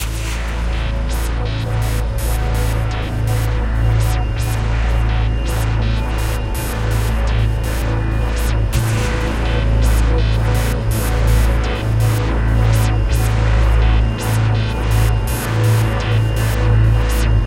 Dark Electronical Scape Inspired from The Video Game "Tom Clancy's The Division".